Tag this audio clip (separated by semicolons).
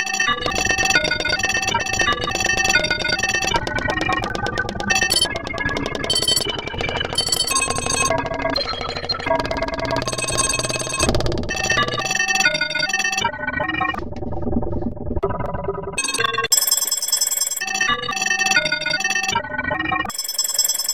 phone; mobile; cell; call